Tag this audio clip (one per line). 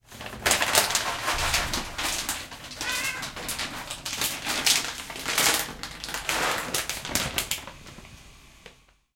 food
serving
cat